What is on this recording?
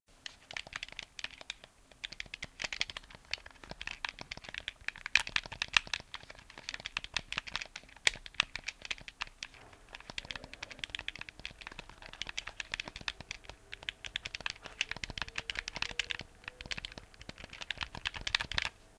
Sound made by a game controller
controller, gamepad, video-game